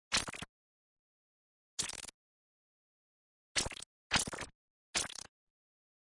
Wet Noise
Muddy gross synth noise C♭
130bpm, mush, sfx, gross, goo, muddy, slime